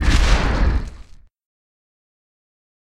Impact 1 full
An impact explosion on a metal surface